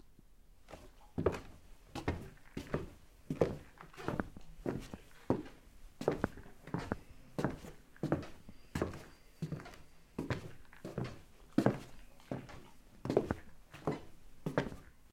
Walking On A Wooden Floor